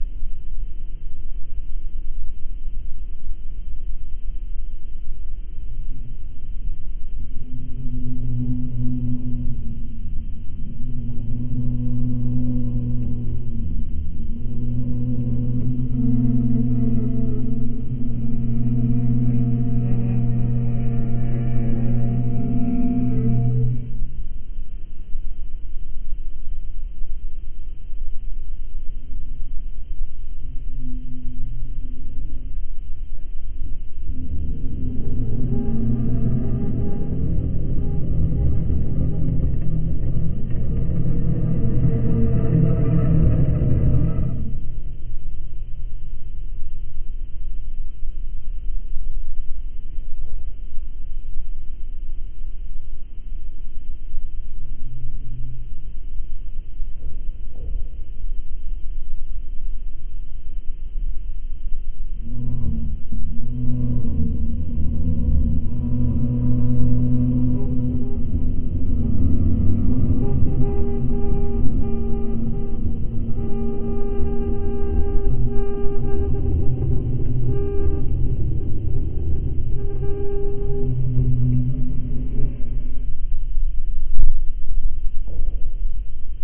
Took my voice and violin to create an atmospheric soundscape.